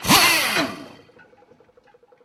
Atlas Copco pneumatic drill with a faded model number ramming through steel, short.